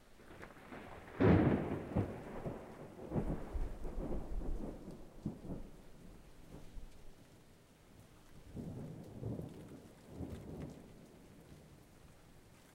Lightning refusing to strike close recorded with laptop and USB microphone.